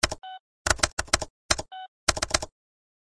CASHIER-REGISTER-KEYBOARD-BEEP-CALC
atm, bap, beep, boop, button, click, clicking, computer, interface, key, keyboard, keystroke, mechanical, mouse, press, register, short, switching, tap, thack, type, typewriter, typing